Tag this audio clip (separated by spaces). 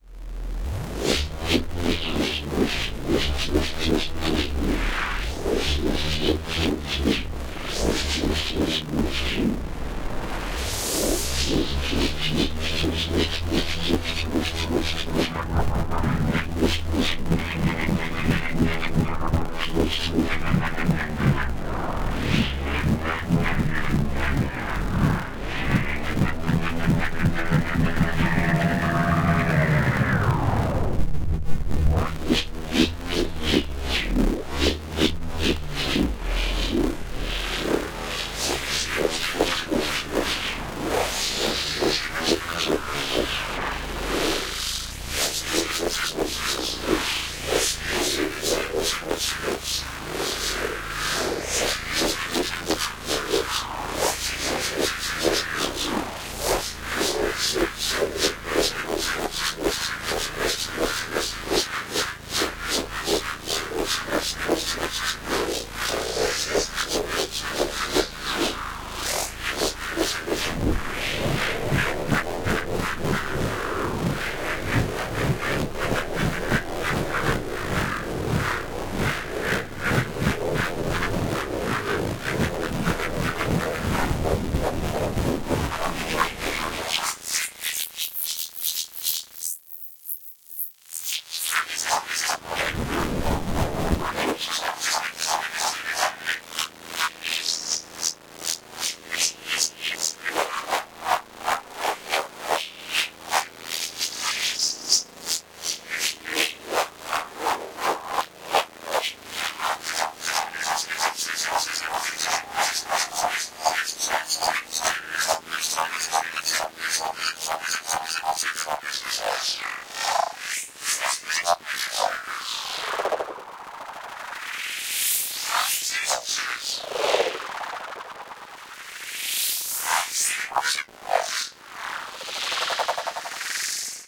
sound-effect future drone pulsing abstract sounddesign electronic panning sfx dark processed static distorted sound-design sci-fi glitchy noise electric digital